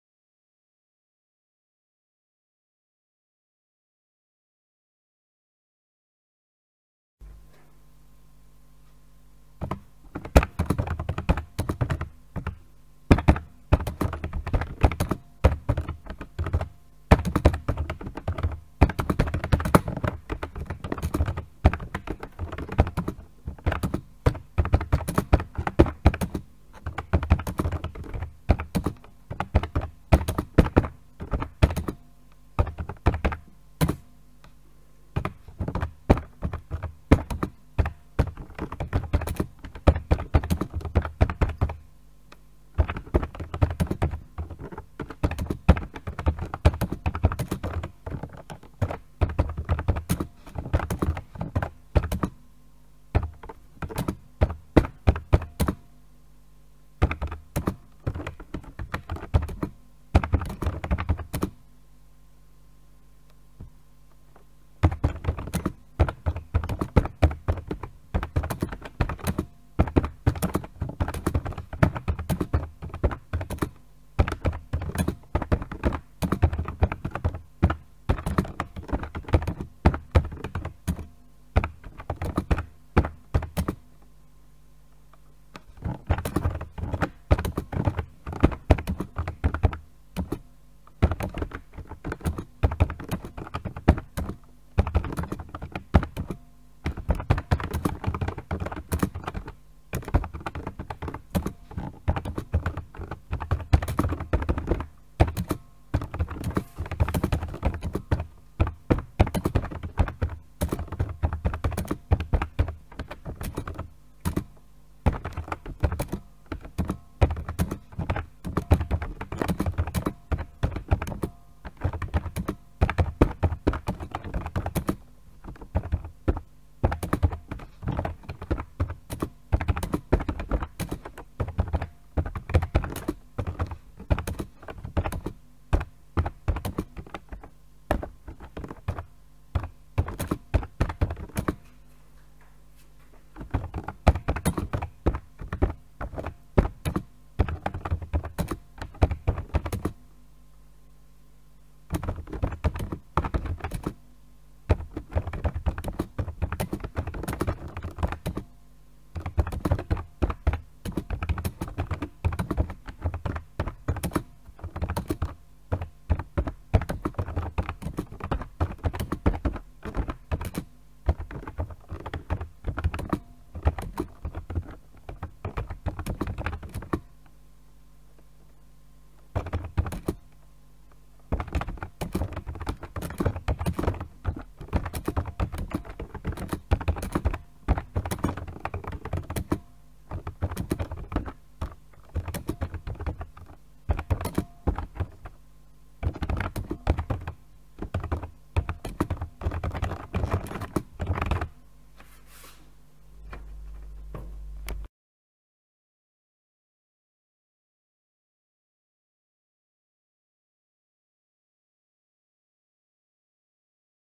KeyBoard Typing
KeyBoard, computer, typing